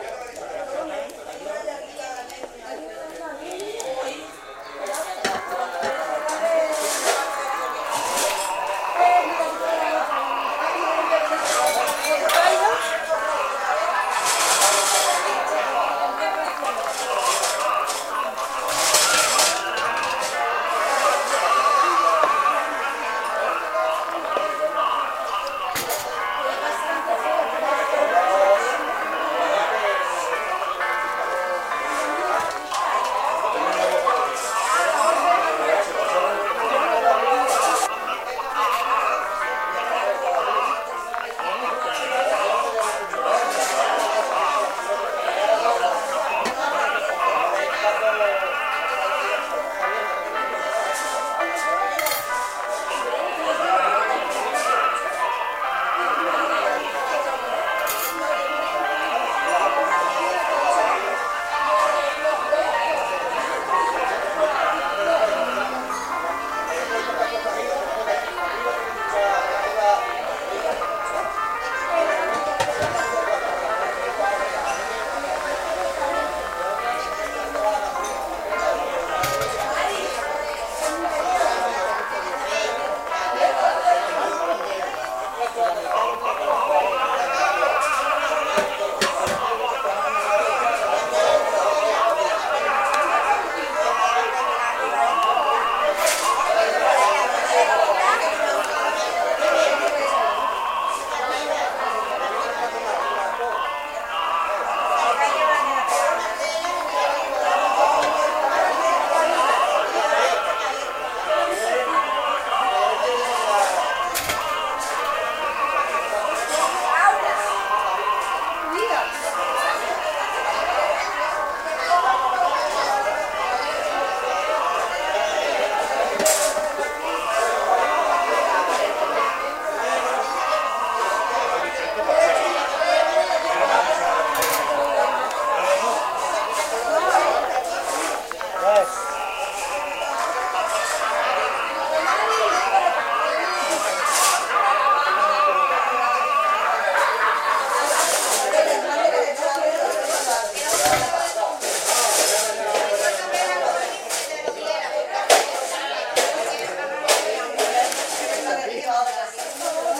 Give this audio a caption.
bar with opera
The sound is like in a bar in Spain with background a man singing opera like a radio.
bar, people